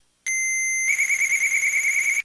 sample of gameboy with 32mb card and i kimu software